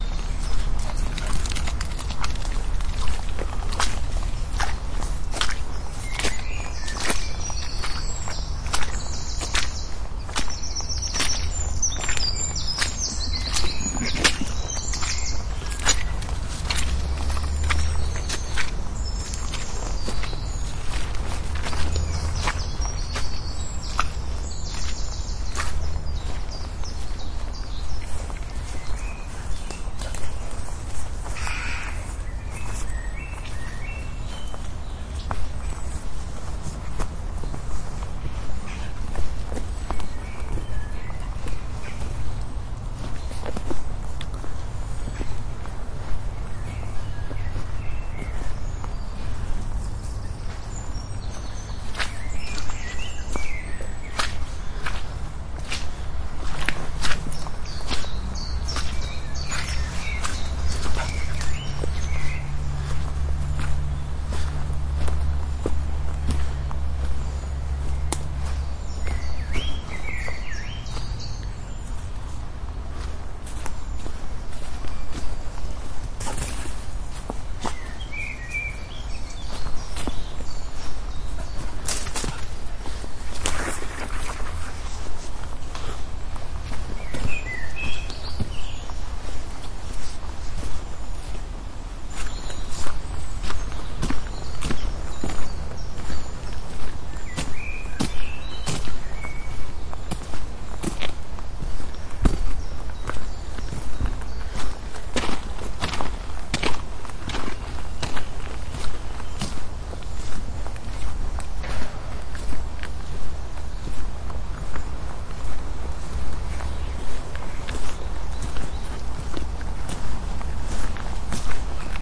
Footsteps walking through woods
Walking through Dawsholm Park in the woods on a drizzly, windy but warm day. Birds chirping in the background. Swampy underfoot steps in wellies. My Spaniel dog is scurrying about too. Recycling plant machinery can sometimes be heard in background
Atmosphere, Birds, Dawsholm, Dawsholm-Park, DR05, Field-Recording, Footsteps, Glasgow, Park, Scotland, Steps, Tascam, Tascam-DR05, Walk, Walking, Wind, Wood, Woods